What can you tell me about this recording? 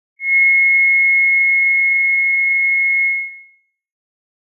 FM trench whistle2
By request - this is an FM synth approximation of the sound a two toned whistle would make. Similar to police whistles and the ones used in trench warfare of WWI.
Tones based around 2100Hz
fm, synth, two-tone, whistle